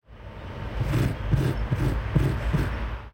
Me scratching the bus stop bench. Faded in and out.
:D